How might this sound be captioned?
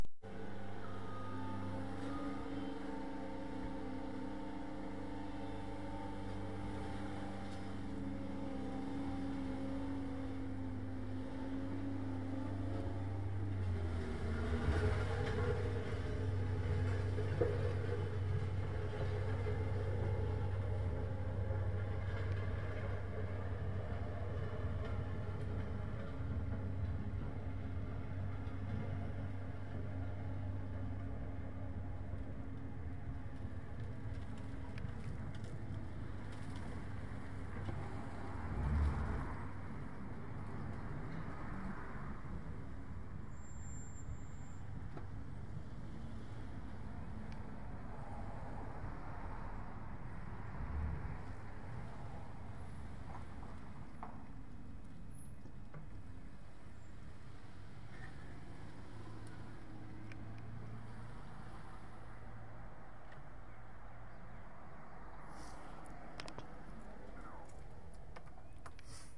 lackey070330 0944a steamroller mov away
Small paving roller, moving quickly away, then moderate traffic. Recorded with iPod, Belkin TuneTalk Stereo; no audio compression. Noticeable handling noise, sniffing near end (sorry!).
end-construction, exit, pavement, paving, roller, steam-roller, steamroller, traffic